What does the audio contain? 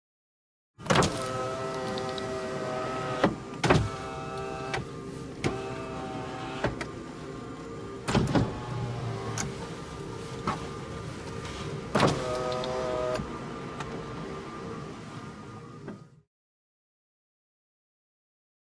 electric car POWER WINDOWS